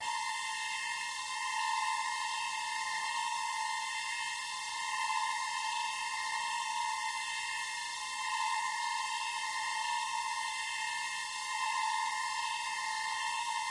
Viral Suspense
Layers of Granualized Synths